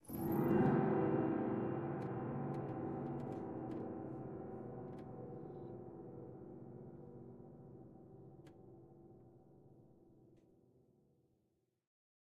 FX Sample GLISS
The sound of all the pedals of an out-of-tune upright piano with a short glissando played at random.
FX, Series, Snickerdoodle, Slur, Out, Of, Gliss, Weird, Upright, Overtone, Sample, Keys, Pedal, Piano, Tune